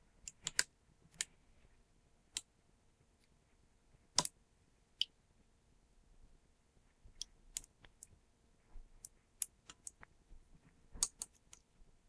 Crushing soda can 05
Me crushing a soda can with a seat clamp.
bench, can, clamp, crinkle, crush, press, seat, smash, soda